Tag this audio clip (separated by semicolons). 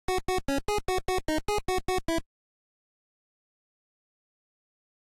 school; found; old; item; 8-bit; classic